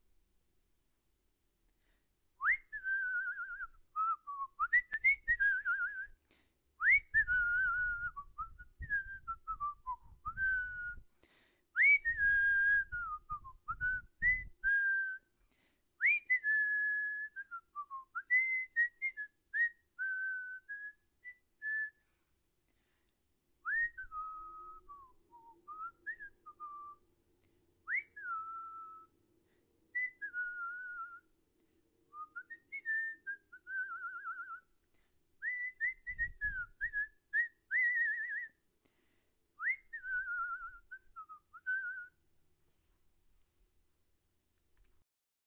me, doing some random, tuneless whistling
human, whistling, field-recording